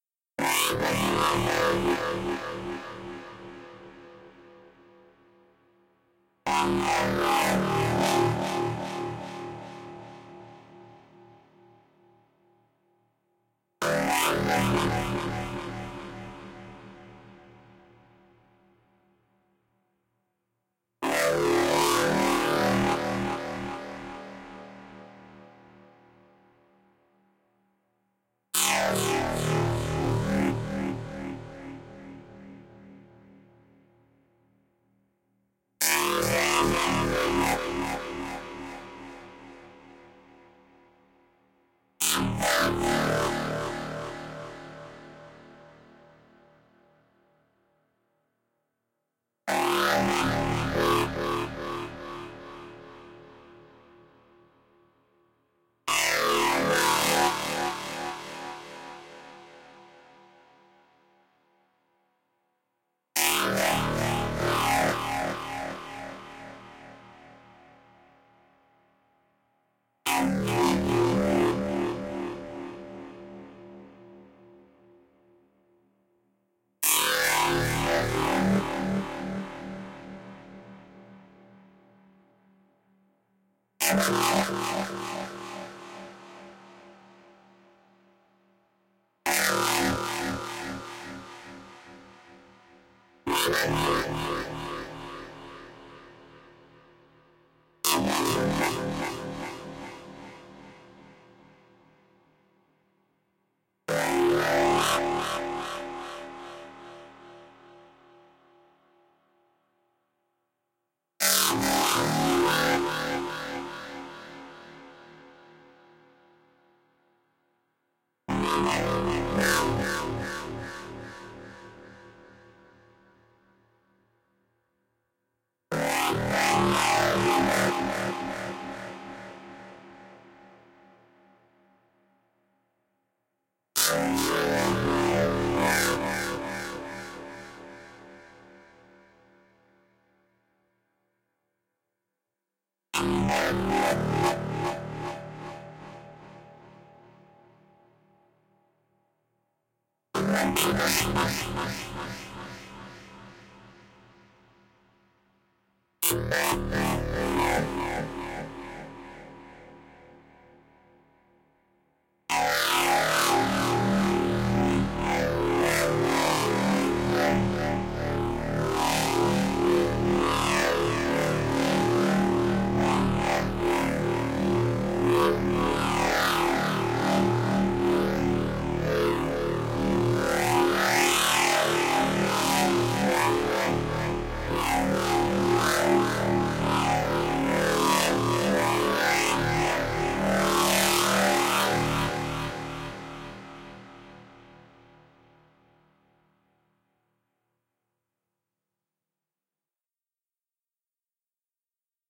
synth monstar 04
heavily efffected synth.
dark, electro, massive, neurofunk, psybreaks, synth, synthesis, techfunk, trance, wobble